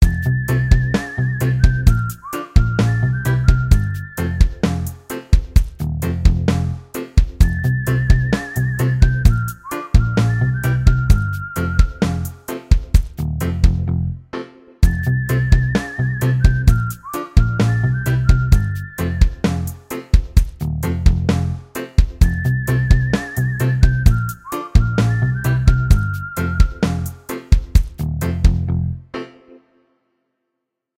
Reggae Whistle With Me
Hey! Just made another lil' music! This time it's a happy and chill Reggae!
Used FL Studio 10.
Rhythm inspired by Inhale Exhale Ft. Protoje!